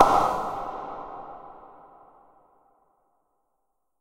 smash close
Synthetic tennis ball hit, smash, performed by the player.
ball, close, hit, smash, tennis